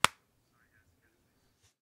A Sony Ericsson W300i flip phone being closed. (Can be used for 'open' sound as well.
Recorded with Apex410 Wide Diaphragm Condenser Mic through MBox2.
cell, cellphone, close, flip, phone